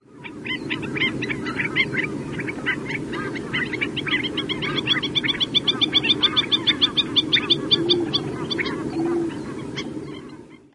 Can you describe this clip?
ag21jan2011t43
Recorded January 21st, 2011, just after sunset.